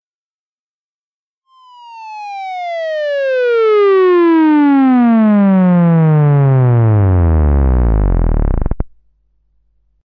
Moog Theremin Sweep
Moog Theremin recorded sweep.
Moog, Sweep, Fx